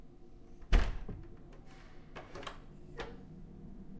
Office sliding door 2
Another office sliding door.
closing, Door, foley, opening, sliding-door